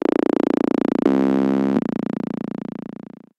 A really strange FM patch that I made on my Nord Modular, he really has a mind of his own. This one rises and falls like slow sleeping robot snoring.